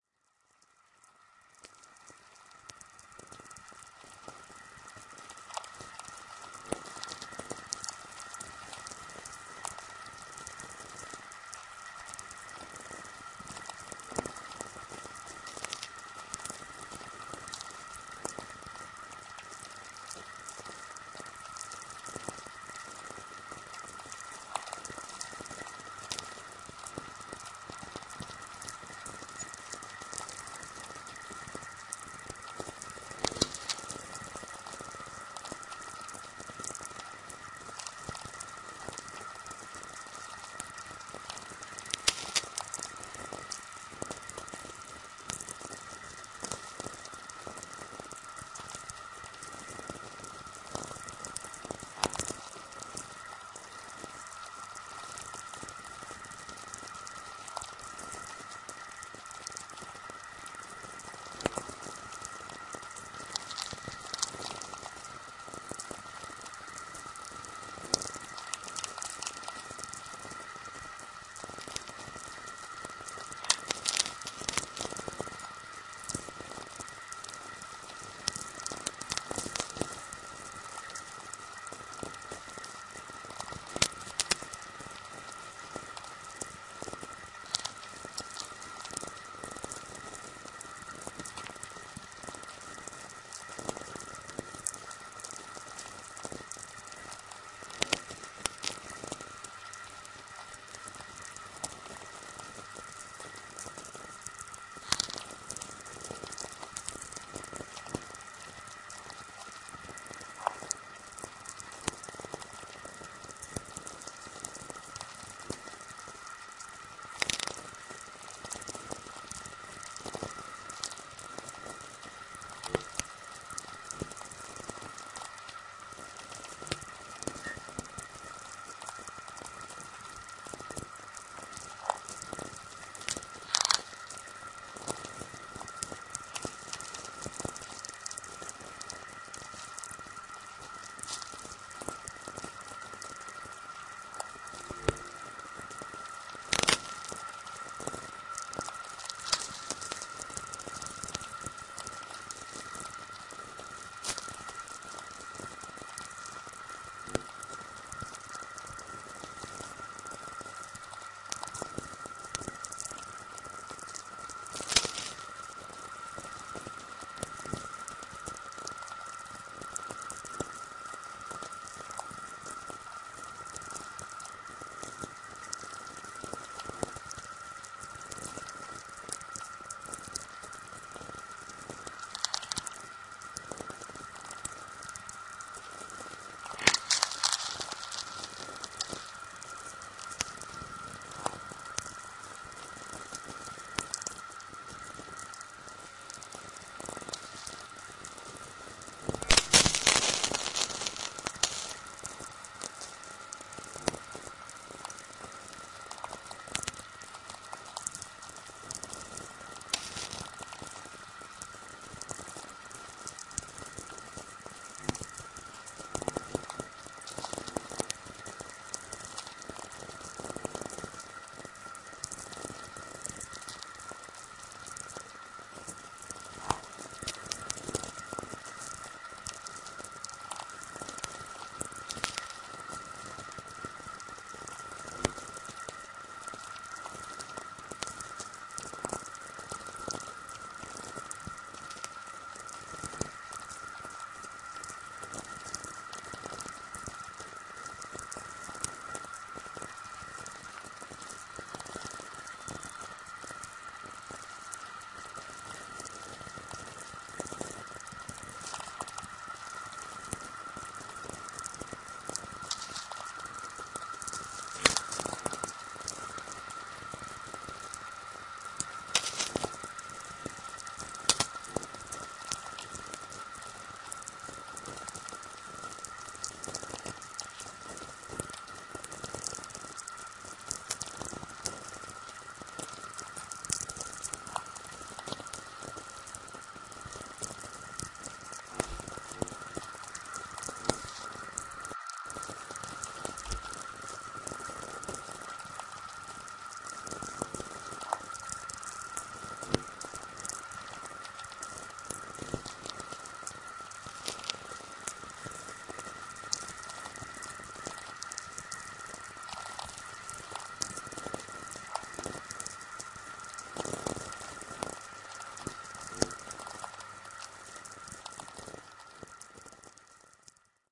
Cumiana+Sheffield-14
Although the project Inspire (NASA) seems to have died, there are still sources of sound sources of VLF noise in the Net.
In this package, I simply offer a few records scattered in time from this site. The stereo samples correspond to a experimental stream that combines two sources balanced on each channel: Cumiana VLF Receiver (Italy) + Sheffield VLF Receiver (UK). The mono tracks correspond to other undetermined receivers.
electronic, noise, radio, shortwave, vlf